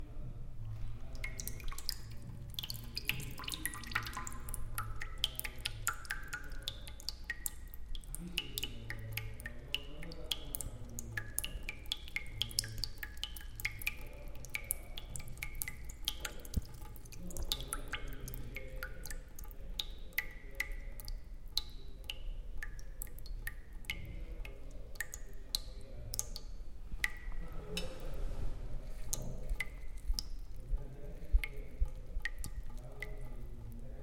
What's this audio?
Agua Goteo 2
Sound generated from a fast drip of water in a puddle.
scl-upf13, puddle, water, drip